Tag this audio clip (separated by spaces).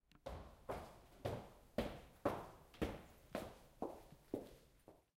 activity Cologne Field-Recording University